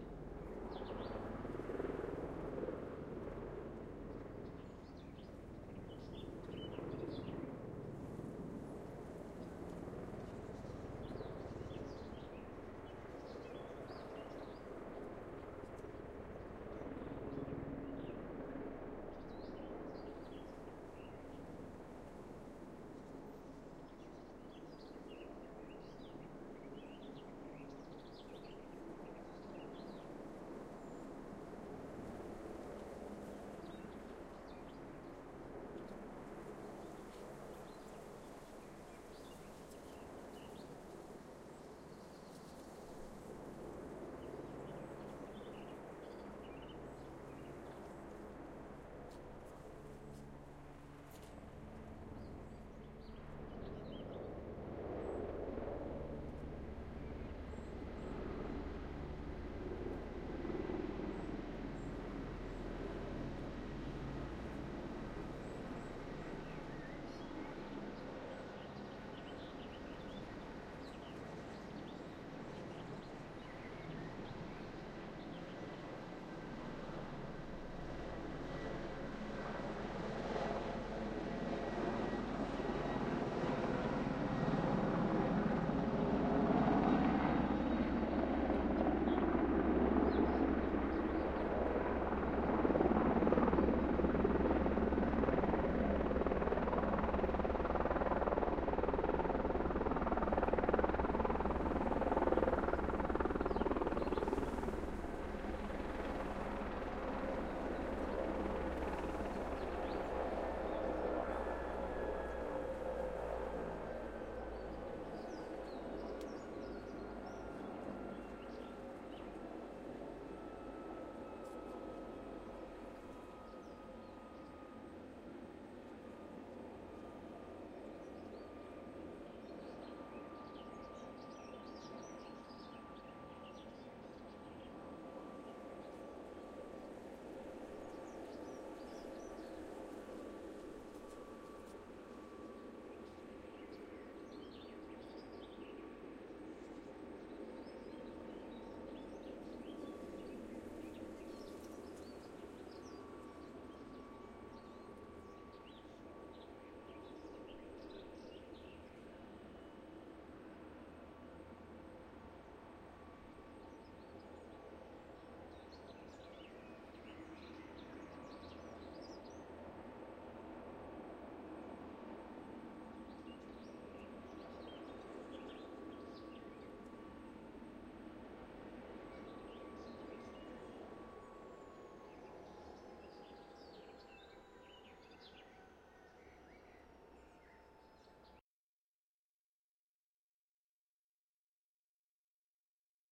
Rural bliss...then this helicopter landing on the field nearby, taking
on a filmcrew and then all day the sounds from a couple of take-offs
and landings to refuel.
All that in June in rural Perthshire. AT 835 ST microphone, Beachtek preamp into iriver ihp-120.
chopper, field-recording, helicopter